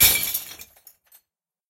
Breaking Glass Mix
All of the other breaking glass recordings mixed together to create a larger crash sound. Original sounds were recorded using Voice Recorder Pro on a Samsung Galaxy S8 smartphone and edited in Adobe Audition, this mix was created with Audacity.